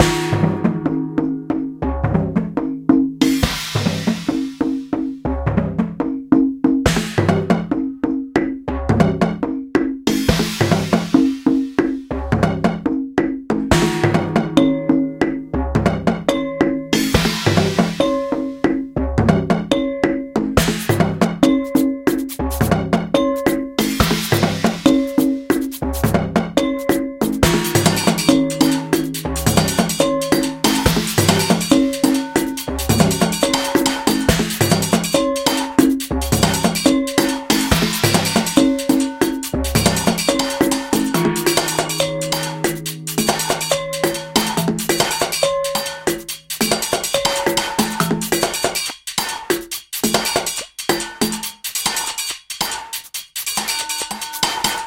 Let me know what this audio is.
Improvized engineered drumming
Well folx this is a rather free form drumloop with several parts in it. I hope you enjoy it. Created with Toontrack's EZ Drummer expansion Twisted kit.